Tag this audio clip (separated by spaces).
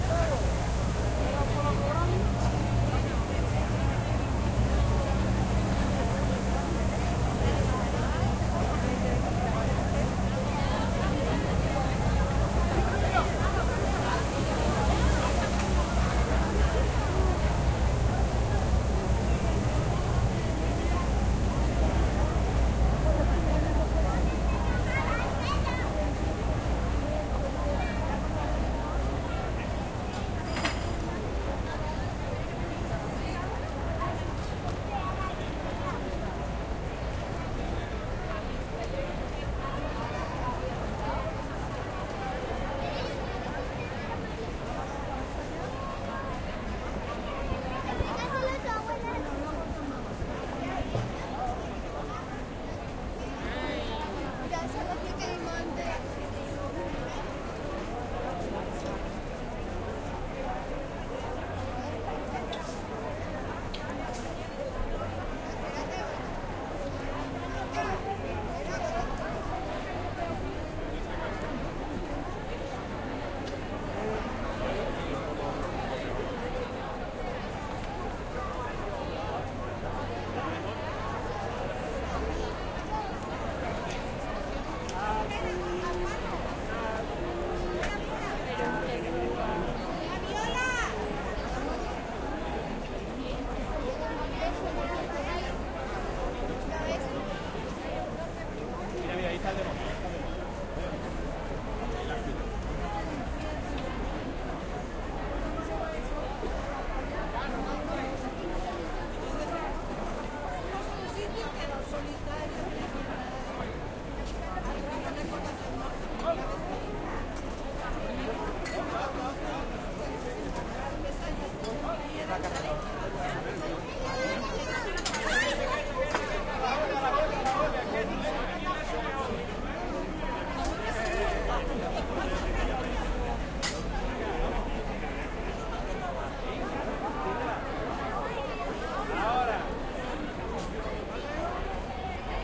christmas crowd sevilla seville